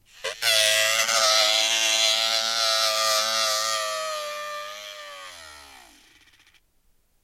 Small motor against cardboard. Sounds like a drill of some type.
dentist, drill, drilling